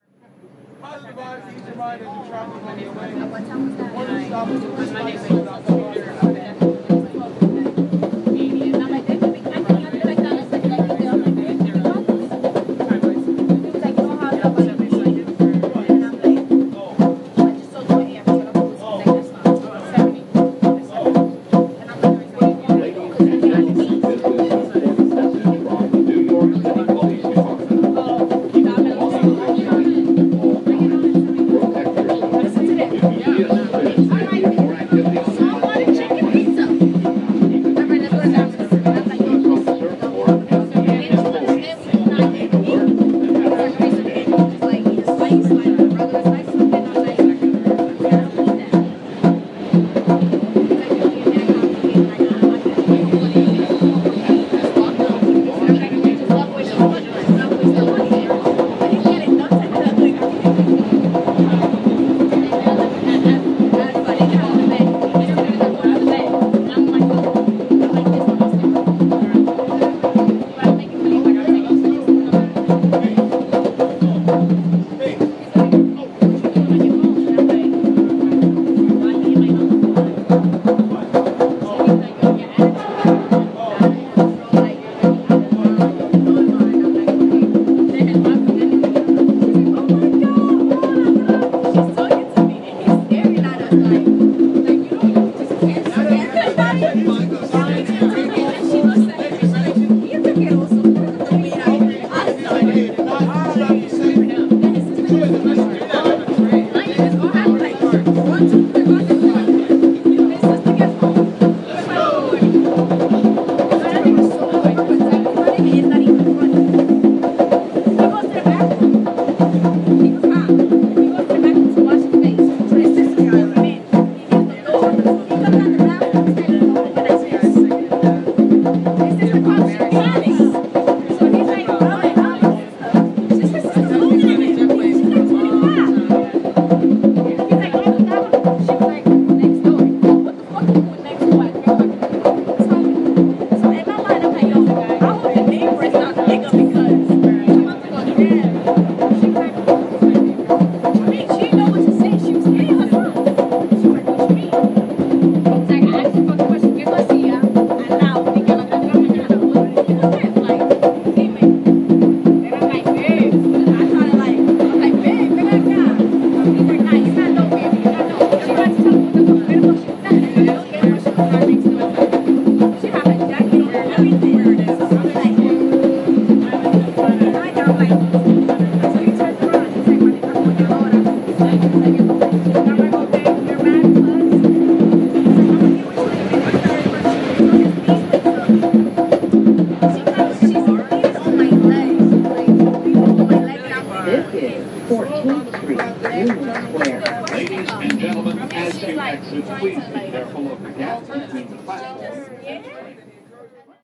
Live sounds of the 4 train. Group of percussionists playing for money on the train, people chatting in the background.